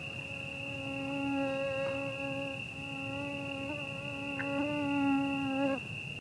when you hear this... somebody wants your blood. The buzz of a mosquito, close up. Crickets in background / el sonido de un mosquito que se acerca buscando sangre
buzz, field-recording, nature, mosquito, insects, donana, crickets, summer, night